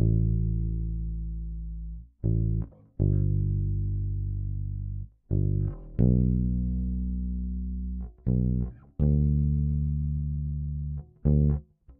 Dark loops 051 bass dry version 1 80 bpm
80, 80bpm, bass, bpm, dark, loop, loops, piano